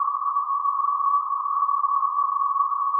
Created with coagula from original and manipulated bmp files. Image of sputnik.